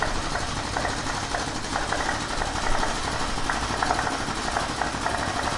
Air pump for spray paint. Record use Zoom H1 2016.01
air-pump, motor, pump